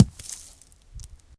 rcok falls 03
rock hits the ground
falling, hitting